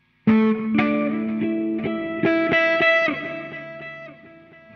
key jaz epi 3 oc 1
Short "octave" guitar sample made with my Epiphone Les Paul guitar through a Marshall amp and a cry baby wah pedal. Some reverb added. Part of my Solo guitar cuts pack.
electronic, guitar, music, processed